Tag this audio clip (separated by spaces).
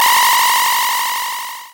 laser beam cartoon video-game nintendo gun shooting shoot spaceship shot videogame games game 8bit arcade retro weapon